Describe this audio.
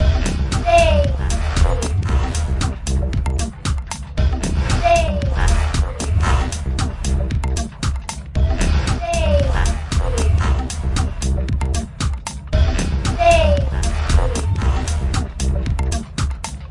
A loop of about 15 seconds loop made with the Reaktor player Molekular.